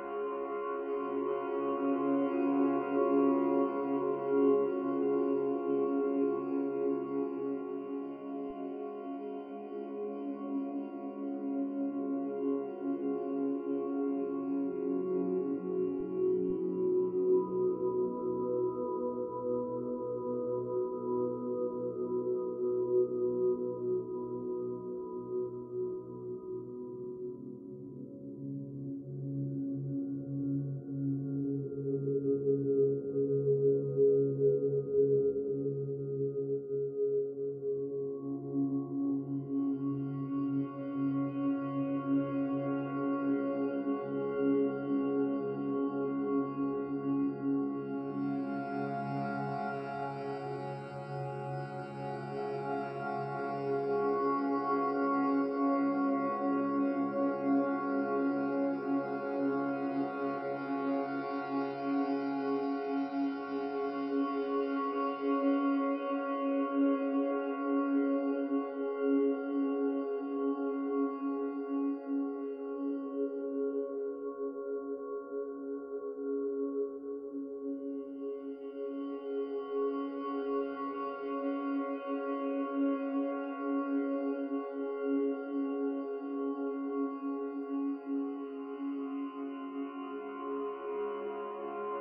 The gods' subtle symphony, resonating over power wires lazily slung beside crops of infinite shade, as cloud-peppered skies transform with their sentiment... or is it a heavenly survey of a lively night in the city... or a sunny suburban morning made audible... or the approaching evening twilight at the playground? Pair this aura with any atmosphere, and every second becomes profound. Fleeting moments emanate a palpable luminescence, saturating the environment in an ambiance unlike any other.
These tones were originally created by a guitar, then filtered through Paul's Extreme Sound Stretch (PaulXStretch).